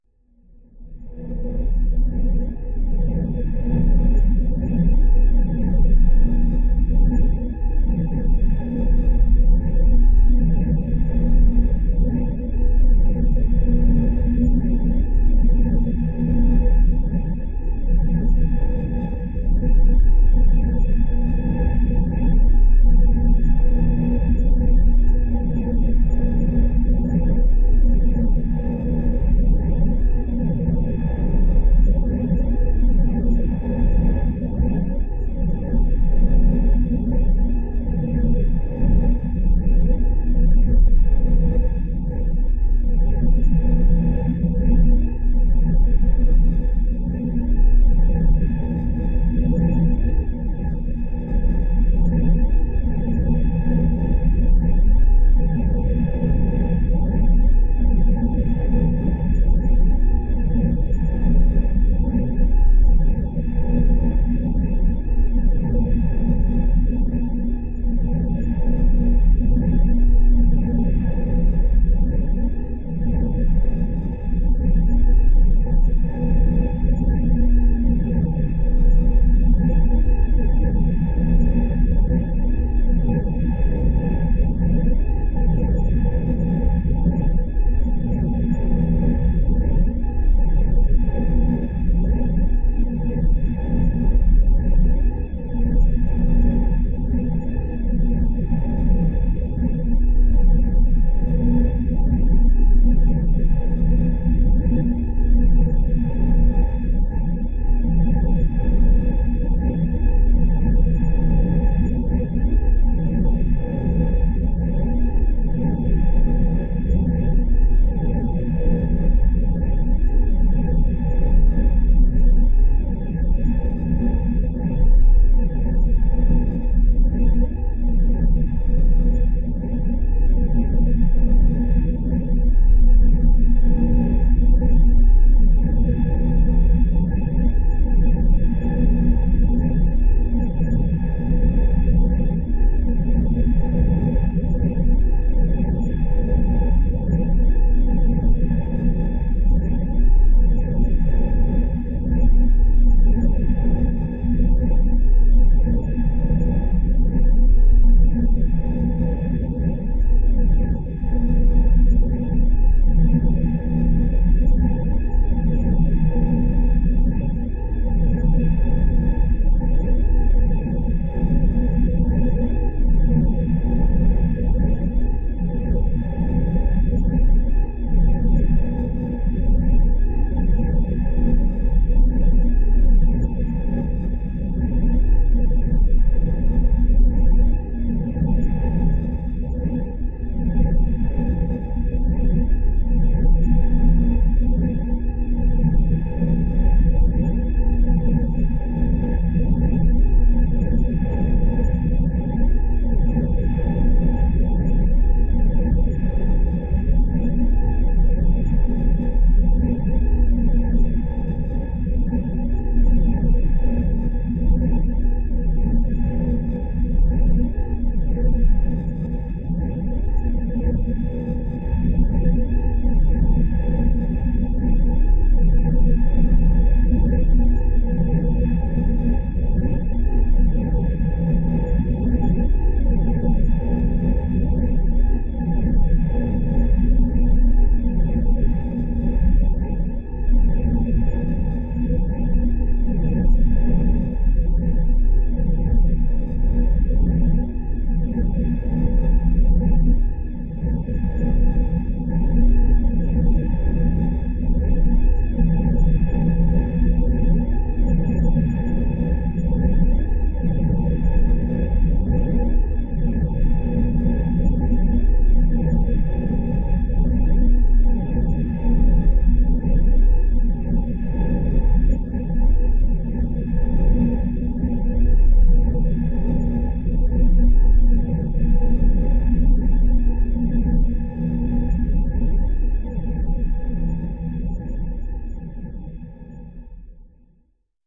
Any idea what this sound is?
Phaser Effect 006
Space, effects